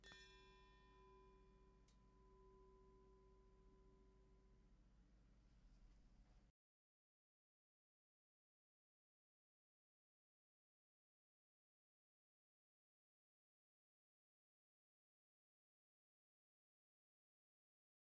Heatsink Large - 07 - Audio - Audio 07
Various samples of a large and small heatsink being hit. Some computer noise and appended silences (due to a batch export).
bell, hit, heatsink, ring